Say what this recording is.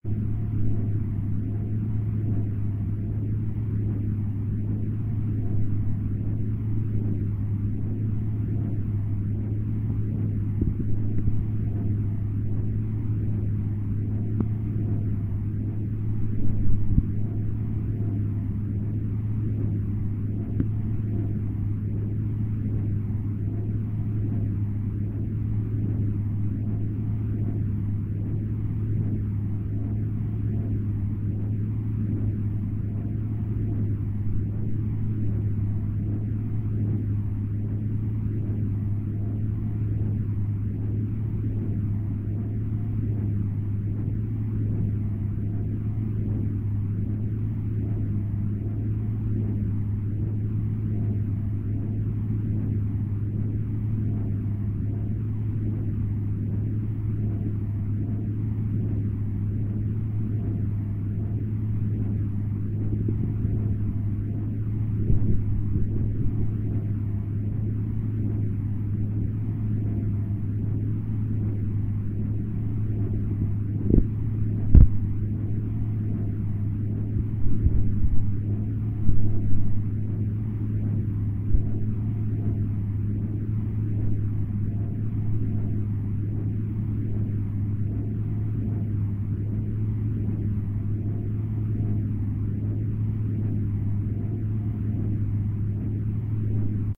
hidrofon test cacat
this is a home-made quick hydrophone test which kinda failed. next up: piezo!
test, mineral, oil, hydrophone